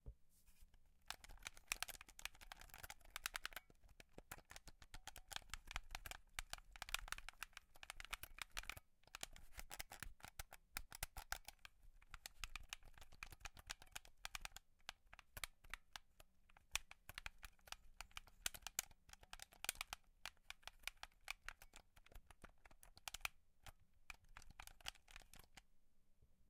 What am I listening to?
Button xbox,recorded on the zoom h5 at home